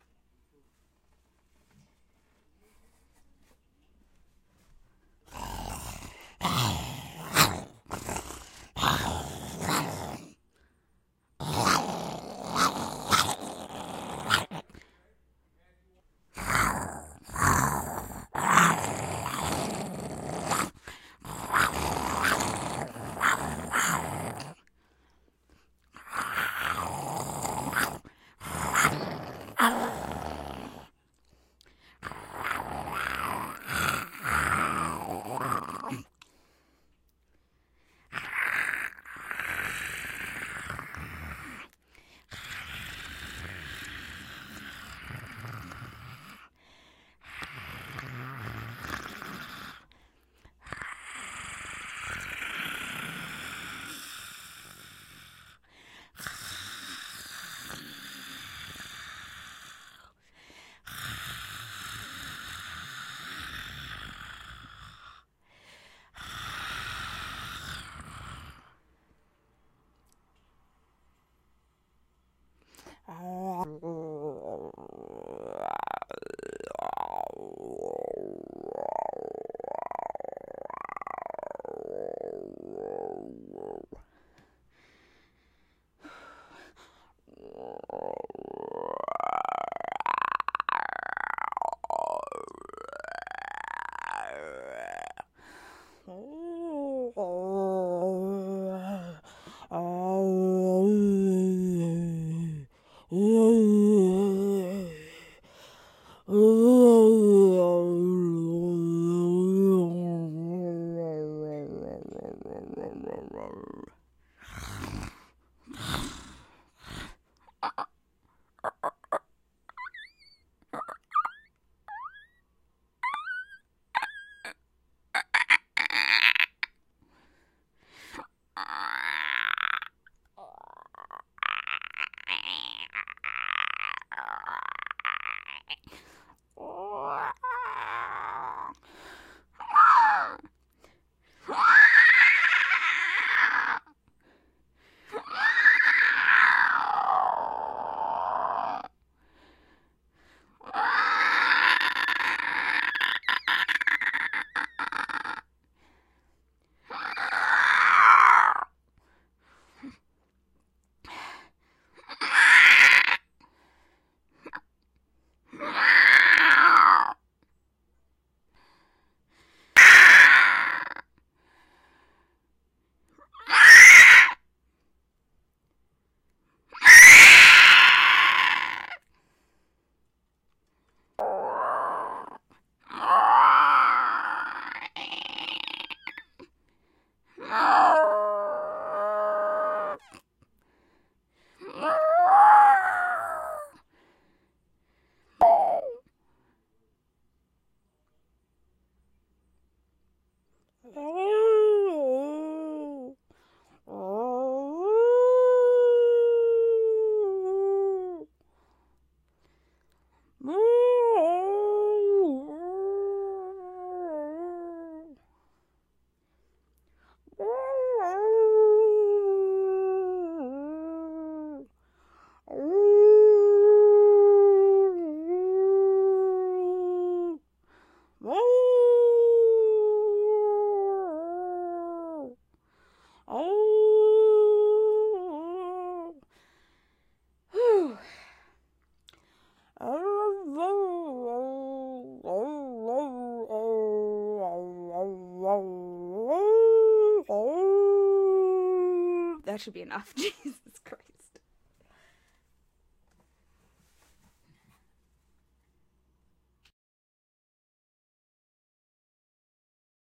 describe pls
Various snarls, screeches howls, growls, ect.
animal, beast, creature, creepy, growl, horror, monster, roar, scary, snarl, terror